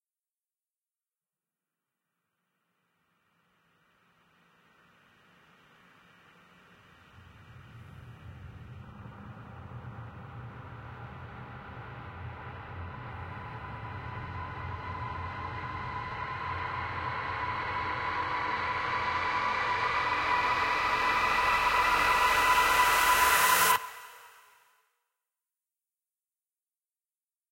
Horror Build up 15 Run!
Horror Build up Riser Sound FX - created by layering various field recordings and foley sounds and processing them.
Sound Design for Horror
tension-builder, build-up, dread, anticipation, riser, run-for-your-life, tension, horror-build-up, horror-riser, horror